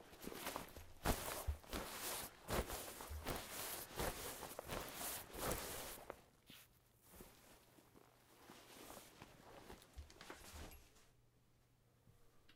General cloth moves, can be used as clothes movement or cloth moves.
Clothes, Cloth